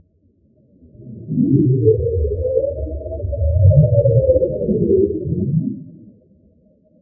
underwater wailing
fi, hydrophone, sci, sea, sink, underwater, wailing, washbasin, washbowl, water